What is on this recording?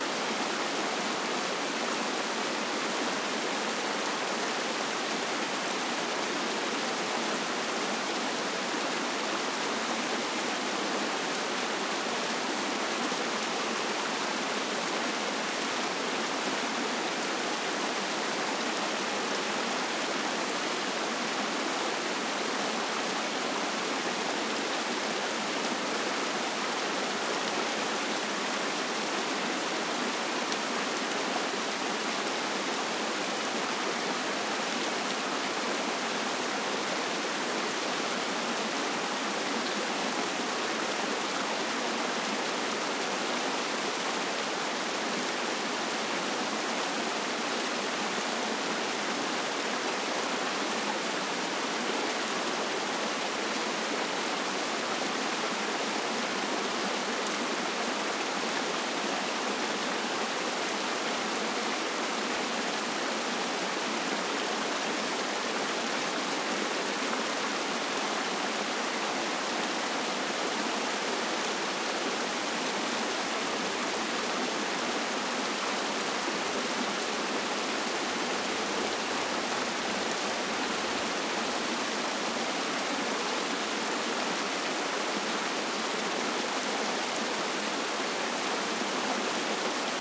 Creek in Glacier Park, Montana, USA. Processed minimally in Adobe Soundbooth. Long samples, mostly, since the ear is incredibly good at detecting repetition.
nature, water, field-recording, flickr